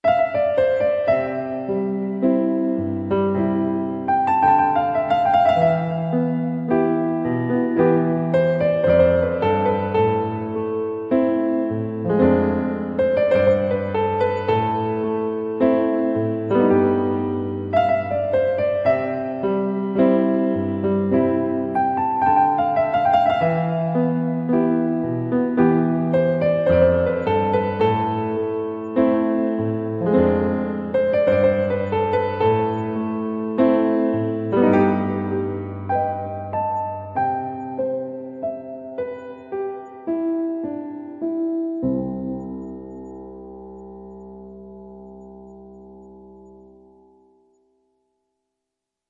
Sad Piano Love Story
Short piano theme that I improvised.